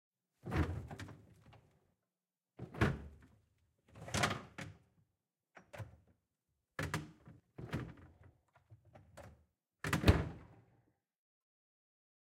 Opening fridge door, grabbing stuff, closing fridge.
Opening a fridge, grabbing some stuff from inside the door, then closing the fridge again.
can be easily cut to match; Or use only the door sounds or sounds of grabbing things from the inside.
close, door, foley, fridge, grab, handling, household, kitchen, open, plastic, refridgerator, spot-effect, spot-effects